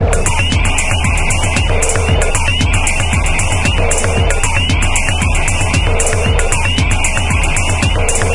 115 BPM STAB LOOP 13 mastered 16 bit
I have been creative with some samples I uploaded earlier. I took the 'STAB PACK 01' samples and loaded them into Battery 2 for some mangling. Afterwards I programmed some loops with these sounds within Cubase SX. I also added some more regular electronic drumsounds from the Micro Tonic VSTi.
Lot's of different plugins were used to change the sound in various
directions. Mastering was done in Wavelab using plugins from my TC
Powercore and Elemental Audio. All loops are 4 measures in 4/4 long and
have 115 bpm as tempo.
This is loop 13 of 33 with a experimental groove to dance to.
dance, loop, drumloop, weird, 115bpm, electronic